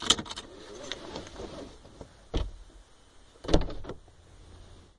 In a car, I remove my seat belt, then open the door. Recording is a bit noisy. Recorded with moto g internal microphones.
car, passenger, car-door, safe, automobile, interior, open, safety, auto, seat, unlock, belt, door, driver, seatbelt